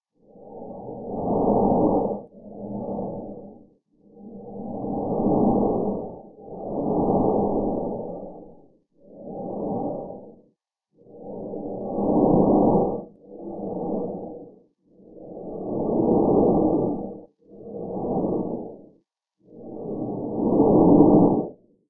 Diver breath01
Sound of a diver breathing. Made on a Waldorf Q rack.
breath, breathing, diver, diving, synthesizer, underwater, waldorf